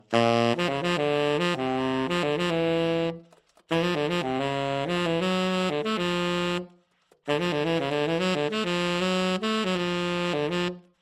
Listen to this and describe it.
Saxophone 2 - Tenor (unprocessed)
Konk Zooben low tenor saxophone melody.